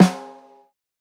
Snare Sample 1
Acoustic snare recorded with an Shure Sm58.